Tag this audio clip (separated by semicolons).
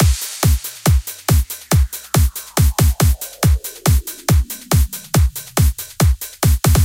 Clap; Hardcore; Hardstylez; Snare; Hard; Electric-Dance-Music; Rave; Loop; EDM; Drum-Loop; Open-HH; Dance